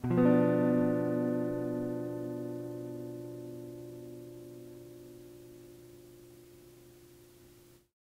Tape El Guitar 13
Lo-fi tape samples at your disposal.
collab-2, guitar, mojomills, el, vintage, tape, Jordan-Mills, lofi, lo-fi